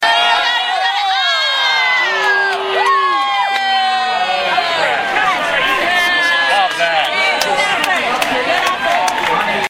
Crowd goes aww followed by boos.